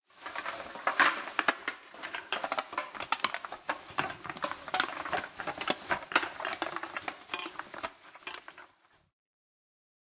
Trash Compactor Compression.